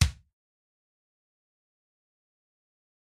Trigger Kick 3
Drum trigger sample for drum enhancement in recordings or live use.
Recorded at a music store in Brazil, along with other kicks and snares, using Audio Technica AT2020 condenser, Alesis IO4 interface and edited by me using the DAW REAPER. The sample is highly processed, with comp and EQ, and have no resemblance with the original sound source. However, it adds a very cool punch and tone, perfect for music styles like rock and metal.